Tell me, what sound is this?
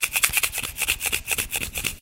Umbrella Noise
An umbrella rapidly opening and closing.